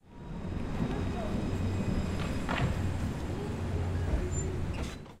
tram arrive
Description: This is the sound of the arrival of the tram recorded in the street around the UPF campus. The background noise it's due to the wind and some people talking. It was recorded at 14:00
Recorded using Zoom H4, normalized and fade-in/fade-out added with Audacity.